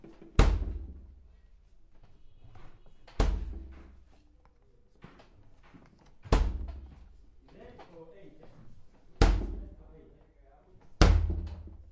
fridge small close door thump +hostel kitchen bg
close, door, fridge